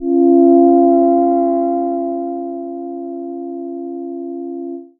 Short Minimoog slowly vibrating pad